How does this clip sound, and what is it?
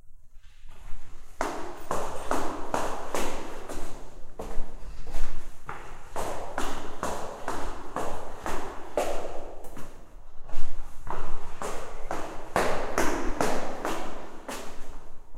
Treppen haus Fustapse